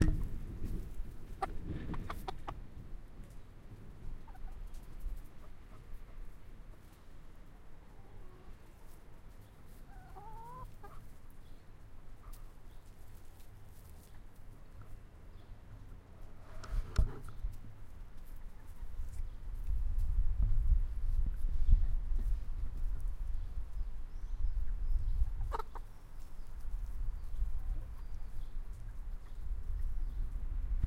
Chicken sounds 4
birds, Chicken, clucking